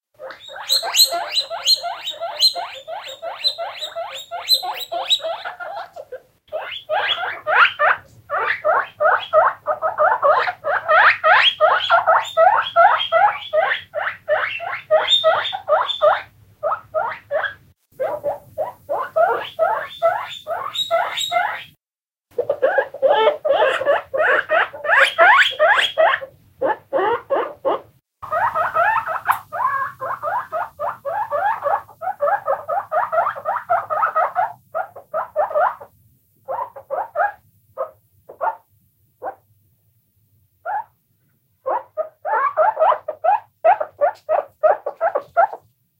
Squeaking Guinea Pigs
Squeaking sounds of guinea pigs
guinea, pig, pigs, sound, squeak, squeaking, squeal, squealing